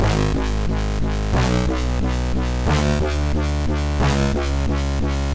180 Krunchy dub Synths 02
bertilled massive synths